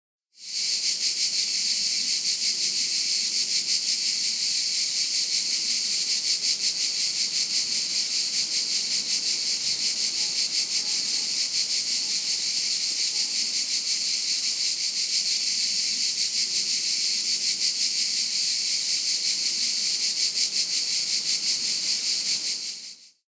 amb-grasshoppers montenegro
chorus of grasshoppers singing. field recording in the countryside of Montenegro. recorded with H4n.
cricket, field-recording, grasshopper, montenegro